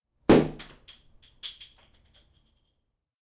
Champagne Bottle
Here is the sweet sound of popping a bottle of champagne
ceremonial, crowd, people, festival, champagne, new-year, celebration, fireworks, party